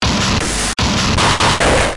Cyberian Flowerbreak oo5
Several breakbeats I made using sliced samples of Cyberia's breaks. Mostly cut&paste in Audacity, so I'm not sure of the bpm, but I normally ignore that anyways... Processed with overdrive, chebyshev, and various other distortionate effects, and compressed. I'm somewhat new to making drum breaks, I'm used to making loops, so tell me how I'm doing!
breakbeat, drum, drumbreak